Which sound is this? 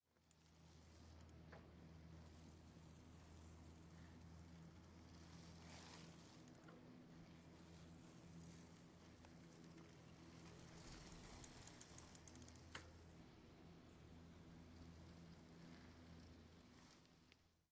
Ca' Ci'cles
aip09, circles, pedaling, bicycle